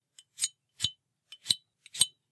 Quick Knife pulls 4 of them
4 quick knife strokes to be used in various ways
war, blade, knight, old, soldier, torture, antique, evil, slash, kill, terror, medieval, sword, metal, mix, pull, fast, pulls, ancient, knife